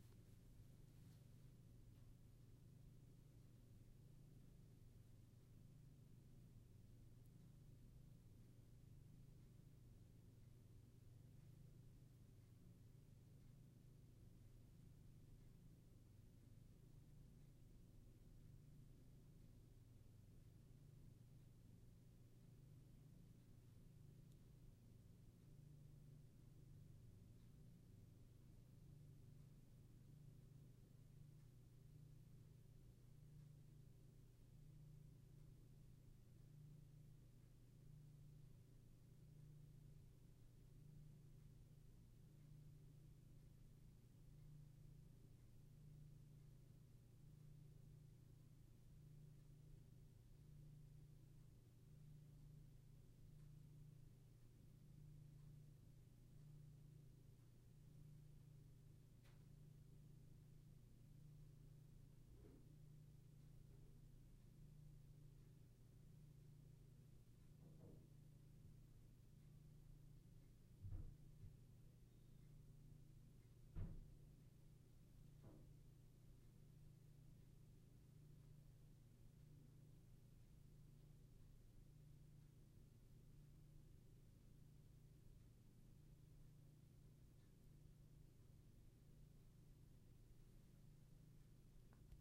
Room Noise 8 Entryway
Entryway to the school.